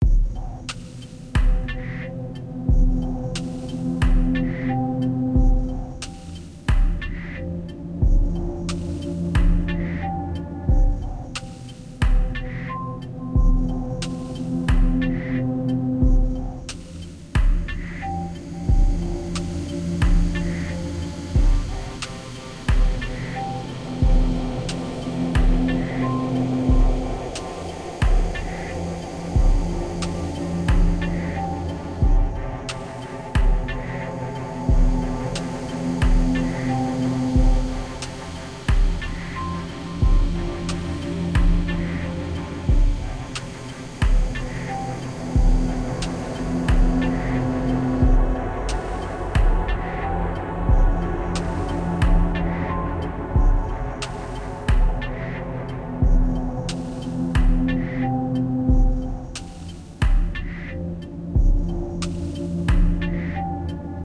Dark Chillout (loop)
This moody but relaxing downtempo loop might come of use for your
thriller and horror videogames
gaming, experimental, videogame, drama, downtempo, chillout, atmosphere, thriller, ambience, horror, pad, soundtrack, creepy, ambient, music, strings, suspense, dark, movie, cinematic, game, film, relaxing, loop